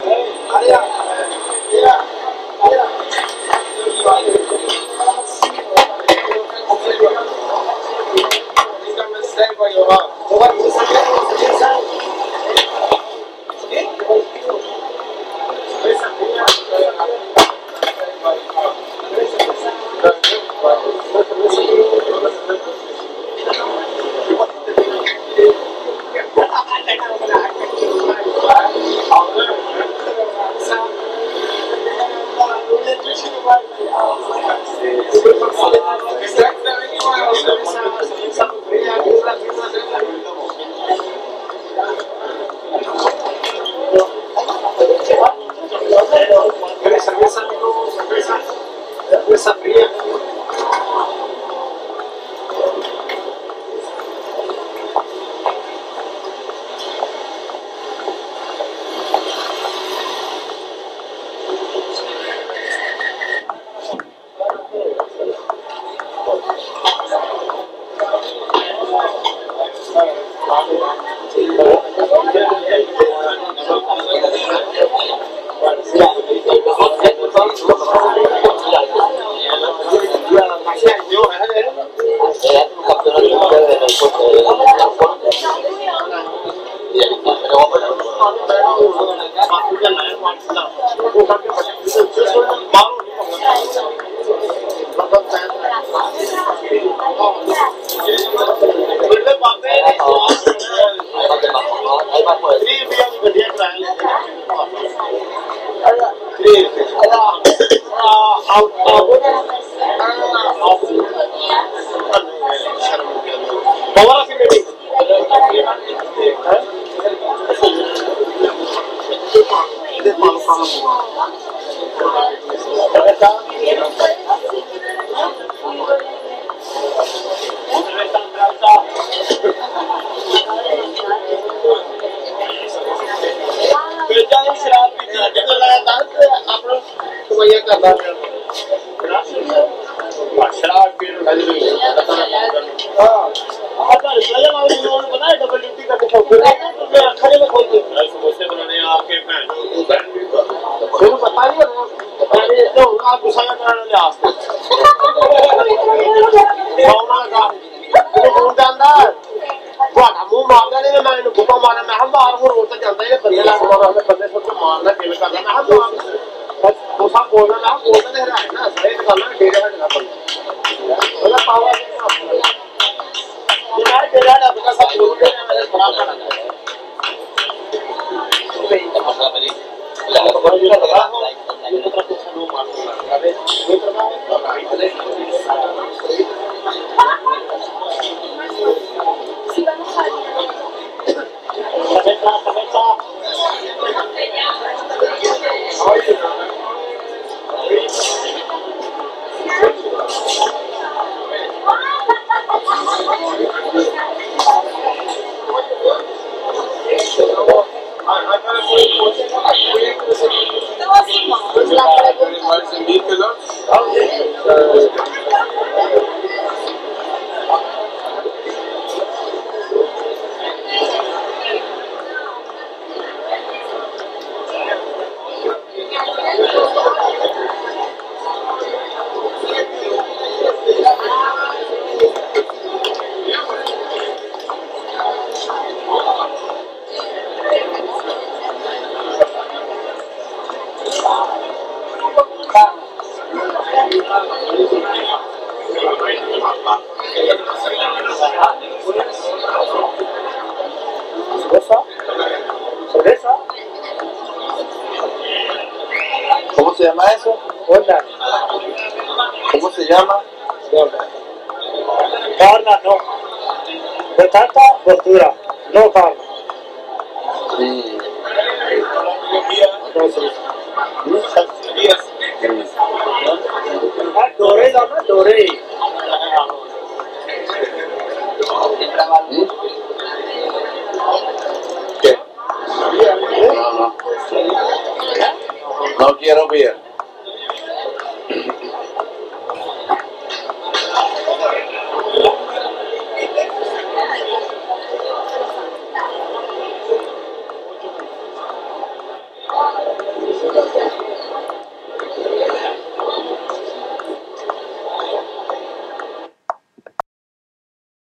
01 Installation Paki
Guys from Pakistan selling beer on the street in Barcelona, they gather and chat around as they also sell fried Samosas
barcelona, beer, catalunya, cerveza, paki, samosa, spain